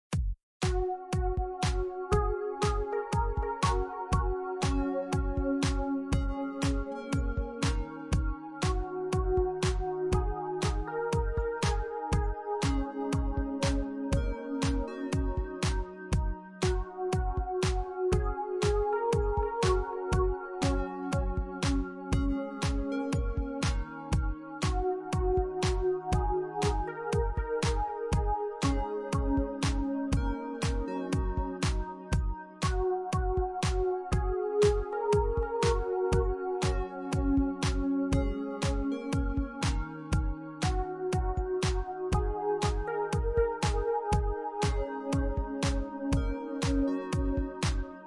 Happy Quirky Loop
A happy casual and slightly quirky loop for your projects.
beats, casual, happy, loop, quirky